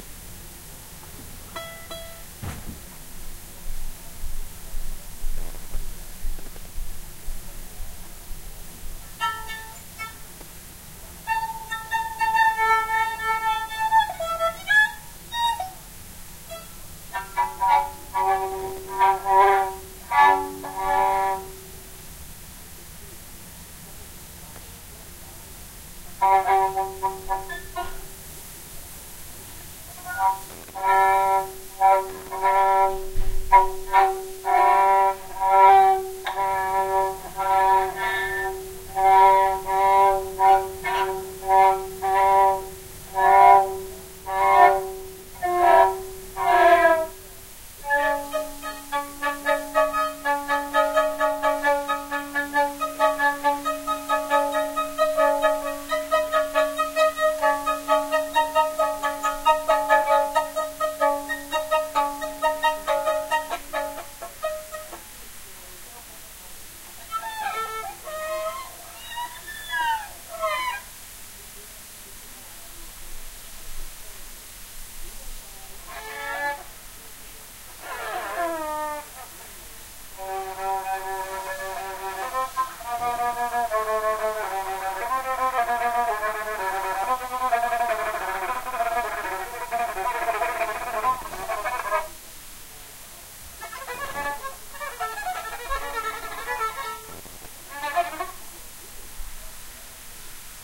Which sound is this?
Raw recording of violin doodling recorded with the built in crappy microphones on an HP laptop with my ancient cool edit 96.
violin, improvization, noisy